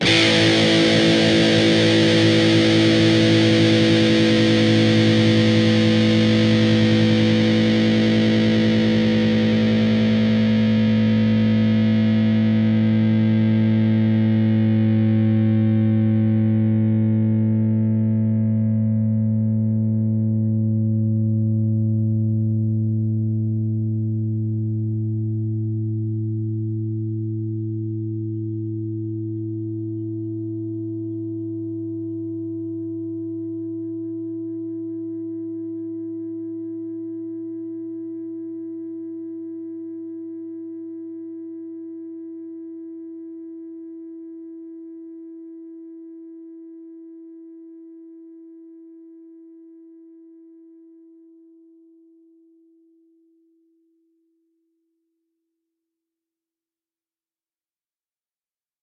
A (5th) string open, and the D (4th) string 2nd fret. Down strum.